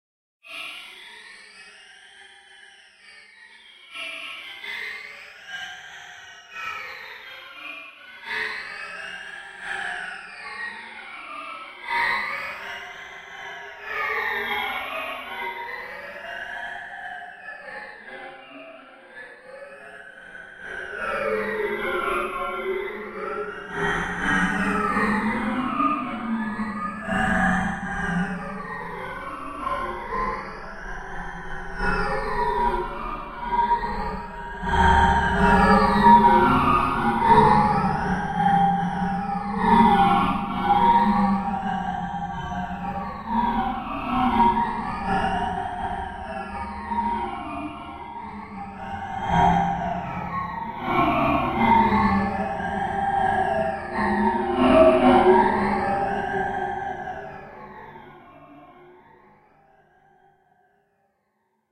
Roars of digital decay
Had some fun with a two water sounds from Korg M1, applied a lot of effects on to it, and this is the result.
digital, halloween, horror, noise, roar, scary, thriller